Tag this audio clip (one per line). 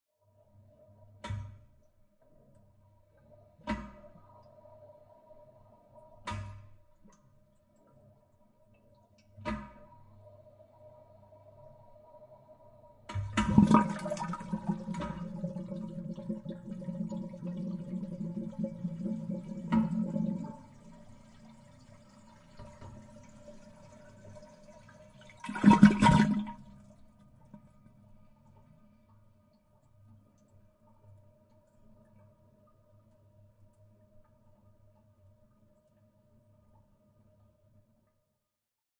toilet
flushing
water
restroom
bathroom
washroom
plumbing
flush